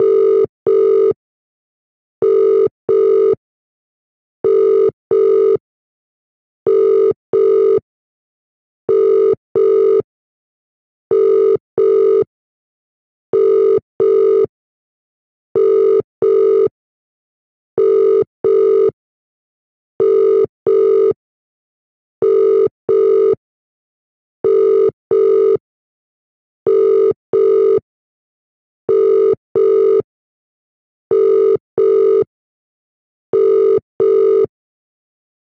Phone Dialling
Mobile phone ringing sound, made in Sylenth
call, cell, dial, hold, mobile, notification, phone, ring, telephone, tone, vibrate